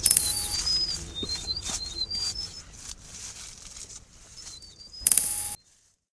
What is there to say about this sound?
HERASIMENKA Dziyana 2016 2017 LookingForThrush
I was looking for an atmosphere of nature and I use three pre-recorded sounds.
The first one was a sound of a whisteling song that I cut, reversed and sped up to make it sounds like birds. The second track was a sound of a ligther I wanted to make it sound like a cricket. For this I cut it, doubled it, amplified and changed the speed and the tempo. The last sound was steps on dry leaves I decreased the amplification and faded out.
Typologie de Pierre Schaeffer : continu complexe X
Analyse morphologique des objets sonores de Pierre Schaeffer :
1. Masse : sons cannelés
2. Timbre harmonique : brillant et éclatant
3. Grain : rugueux
4. Allure : sans vibrato
5. Dynamique : attaque abrupte
6. Profil mélodique : variation serpentine
7. Profil de masse : site, différentes variations et hauteurs